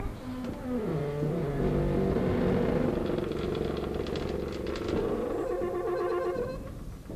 MICHEL Elisa 2017 2018 Creaking
I recorded a creaking door, reduced the noise and slowed it down.
Ce son est continu et varié (V). Il est nodal, le timbre est terne, très grave. Le grain est rugueux, l’attaque n’est pas violente. Les hauteurs de ce son sont glissantes (variation serpentine). Le profil de masse est calibré, tout étant à la même hauteur.
scaring, monster, door-creaking, creaking